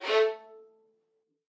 One-shot from Versilian Studios Chamber Orchestra 2: Community Edition sampling project.
Instrument family: Strings
Instrument: Violin Section
Articulation: spiccato
Note: A3
Midi note: 57
Midi velocity (center): 95
Microphone: 2x Rode NT1-A spaced pair, Royer R-101 close
Performer: Lily Lyons, Meitar Forkosh, Brendan Klippel, Sadie Currey, Rosy Timms
violin, single-note, violin-section, multisample, spiccato, a3, midi-note-57, strings, vsco-2, midi-velocity-95